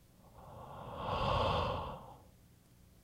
breathe out (4)
A single breath out
Recorded with AKG condenser microphone M-Audio Delta AP
air, breath, breathing, human